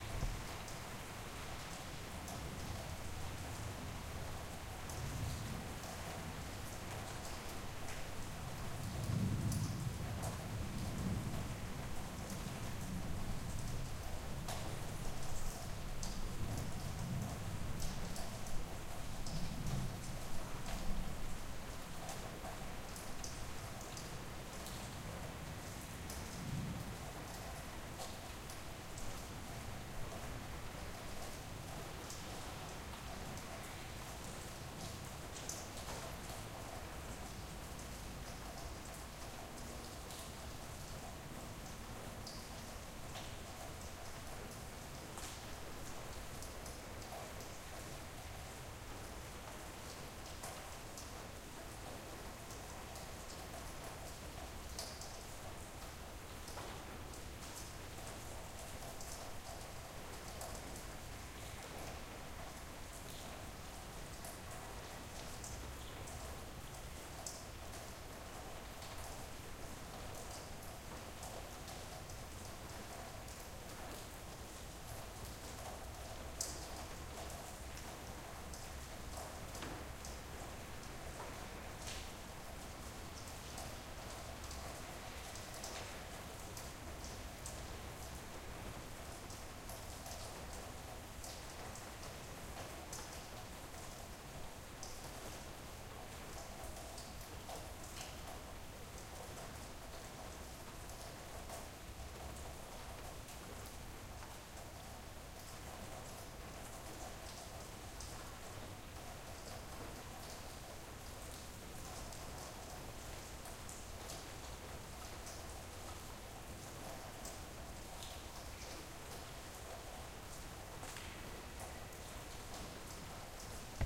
regen3 LR
perfect recording of medium rain, recorded with a zoom 4N in a very silent monastery in Limburg, Holland.
medium, monastery, rain